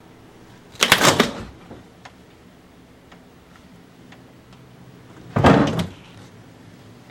open and closing of a fridge door.
door, fridge, refridgerator